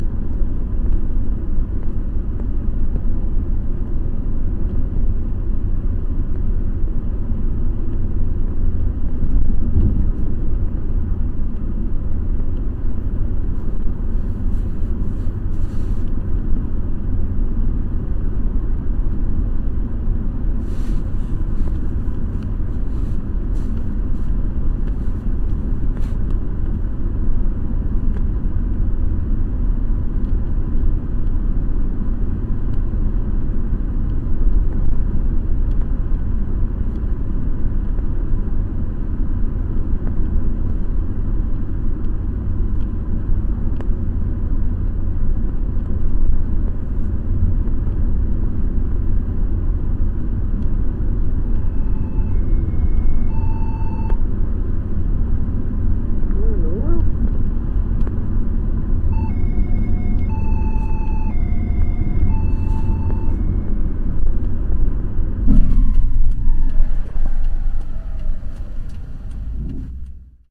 UFO encounter, Fake or real
A UFO encounter. The trick is though, you have to decide if it is true or false.
screech, human